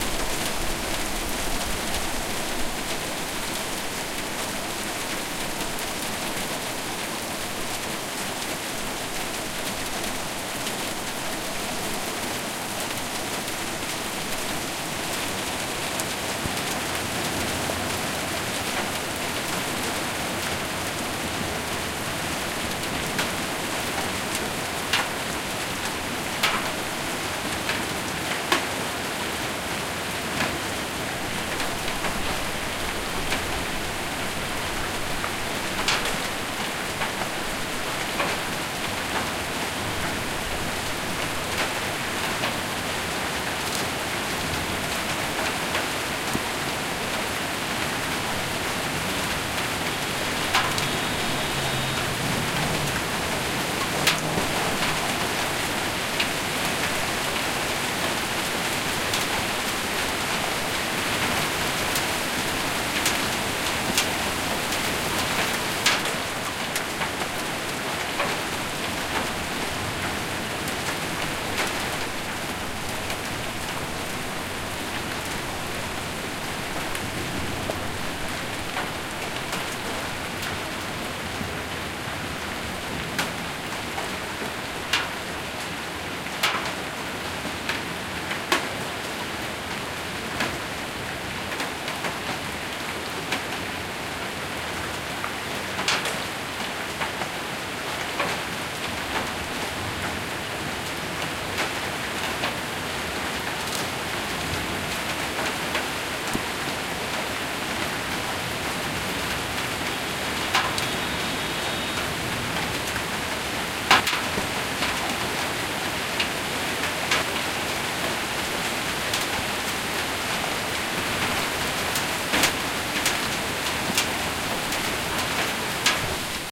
Rain brings hail
Light rain brings light hail in the city, Tbilisi, Georgia
Tascam DR-40x
ambient, field-recording, weather, ambience, hail, city, soundscape, ambiance, rain, sound